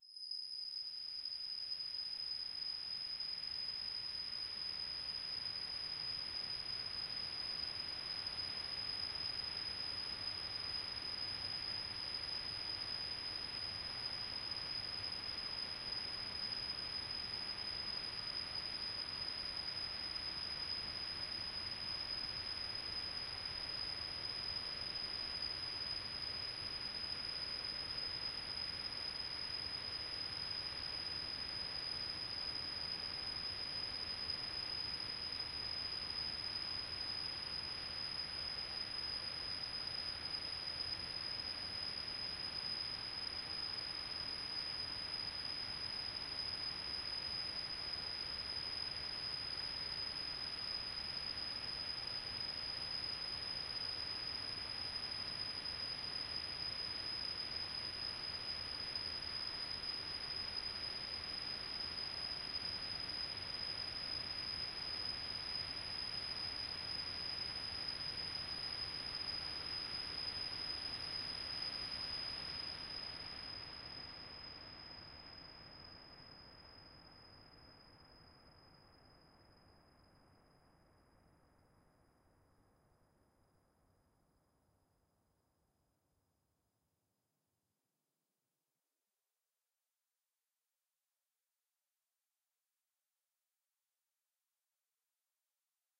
LAYERS 015 - CHOROID PADDO- (123)
LAYERS 015 - CHOROID PADDO is an extensive multisample package containing 128 samples. The numbers are equivalent to chromatic key assignment covering a complete MIDI keyboard (128 keys). The sound of CHOROID PADDO is one of a beautiful PAD. Each sample is more than one minute long and is very useful as a nice PAD sound. All samples have a very long sustain phase so no looping is necessary in your favourite sampler. It was created layering various VST instruments: Ironhead-Bash, Sontarium, Vember Audio's Surge, Waldorf A1 plus some convolution (Voxengo's Pristine Space is my favourite).